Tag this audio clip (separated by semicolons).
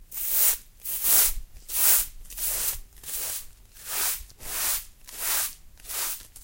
cleaning; falling; sweep; sweeping; broom